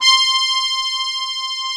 real acc sound
accordeon, keys